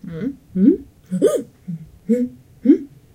1 hmm sorpresa ovejas
scared, wow, Surprised